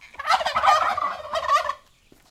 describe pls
H2Zoom recording Mexican ranch turkey
Mexico, turkey, field-recording, pavo